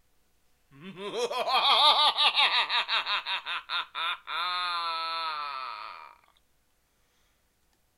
evil laugh-06
After making them ash up with Analogchill's Scream file i got bored and made this small pack of evil laughs.
cackle, evil, laugh, male, single, solo